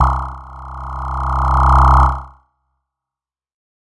This is the first in a multisapled pack.
It is the note C. The samples are every semitone for 2 octaves. These can be used as pad with loop points added where you want it to sustain.
noise, pad, tech